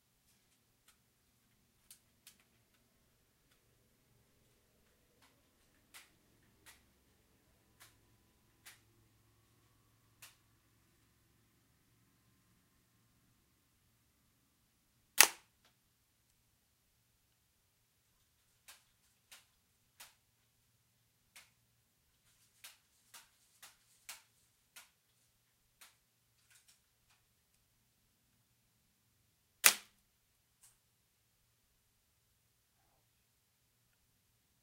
What I thought was aluminum but turned out to be plastic packaging container from the slats in the legendary vertical blinds as a .177 caliber steel ball bearing passing through it from around 30 feet away recorded with a Samson USB microphone direct to cool edit on the laptop.